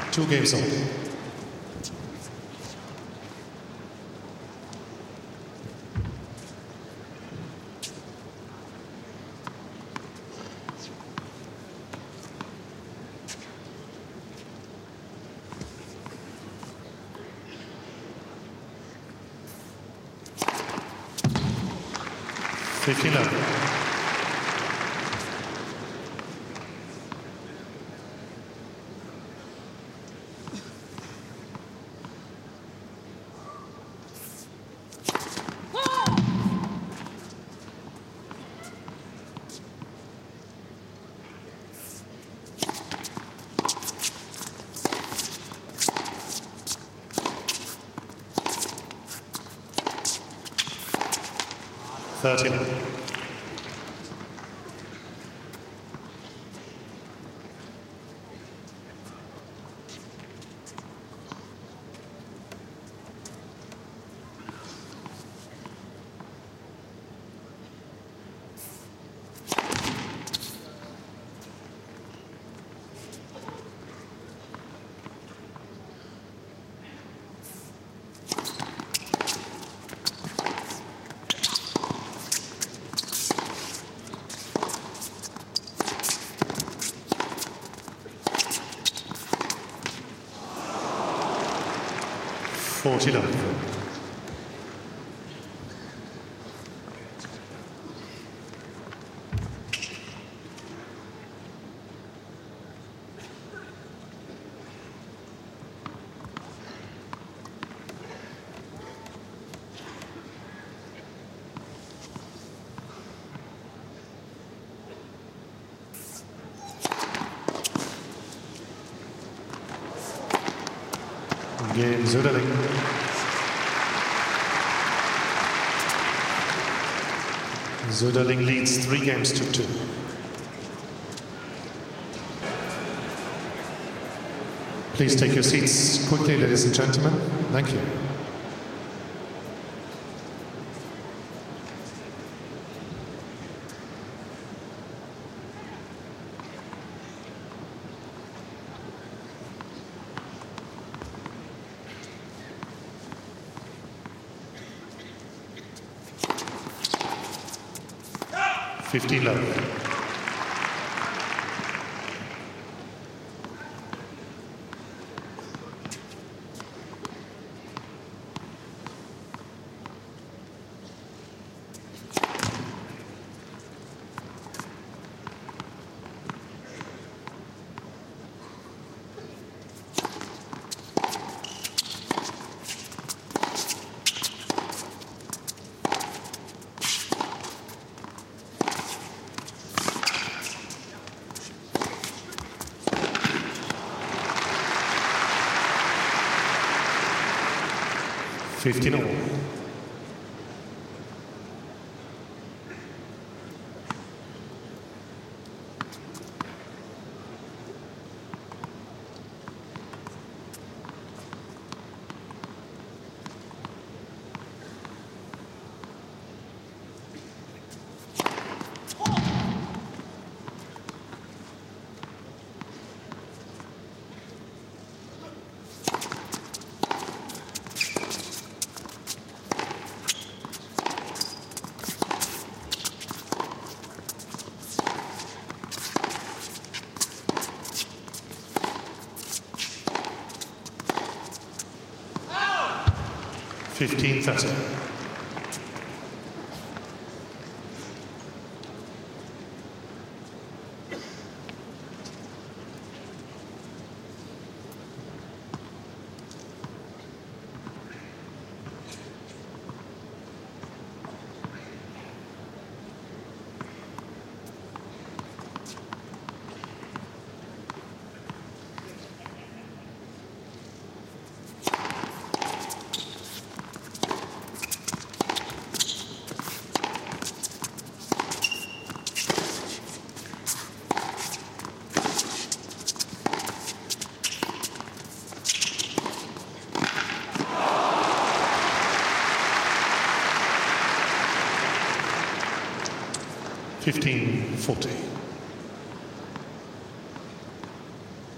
tennis match
Mixed for broadcast, stereo fx from ATP tennis tournament in Rotterdam, netherlands.
sennheiser 416 mics on playing field, AT stereo shotgun from under umpire chair aimed at net, 4 neuman km140 mics for crowd reaction..
atp, sports, tennis, match, broadcast, effects